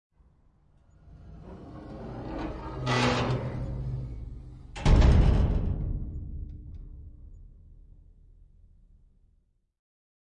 thin metal sliding door close slam
thin metal sliding door closing with a slam
close, closing, door, doors, field-recording, hard-effect, hollow, metal, shut, slam, sqeaking